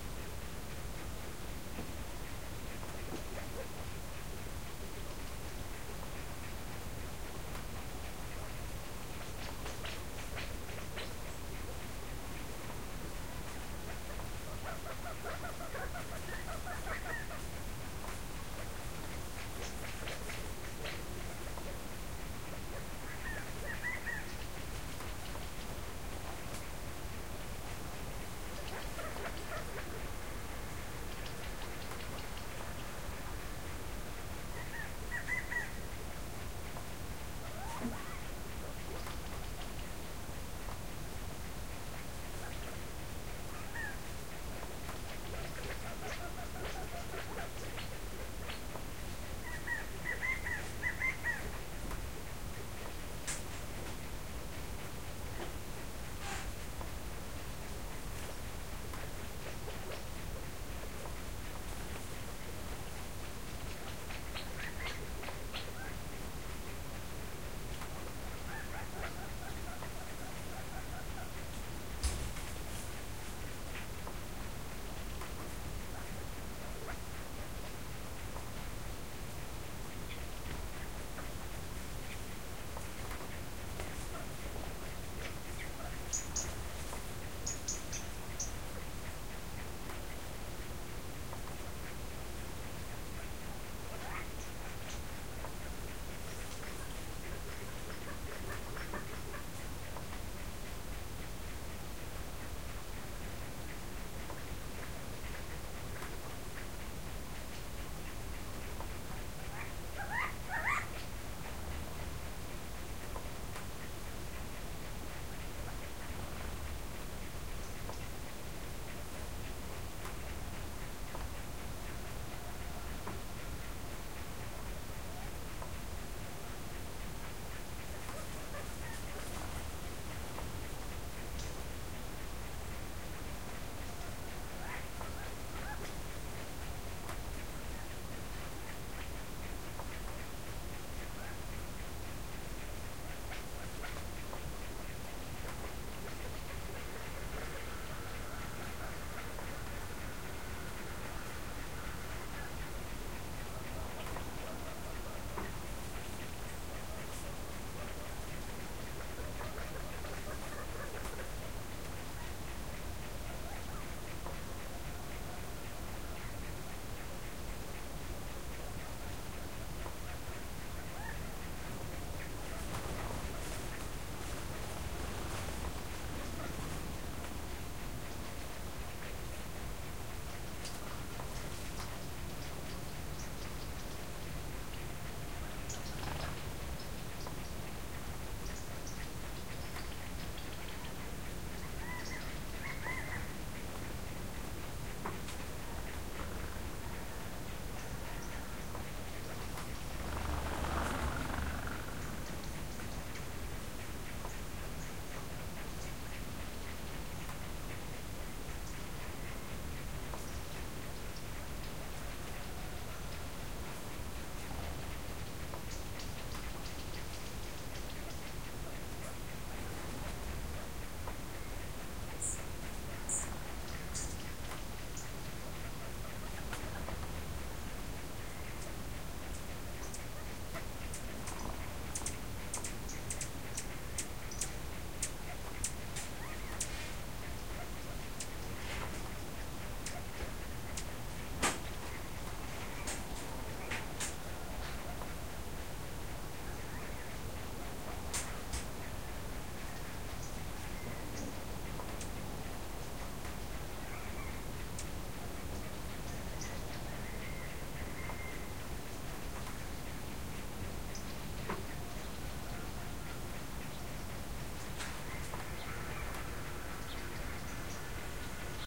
An early morning field recording from Nile Valley, near Naches, Washington. In this recording you will hear birds and a herd of Roosevelt Elk. This was recorded in early September of 2018 using my Tascam DR08.
Roosevelt-Elk, Tascam-DR08, Field-Recording, Morning, Cascades, Naches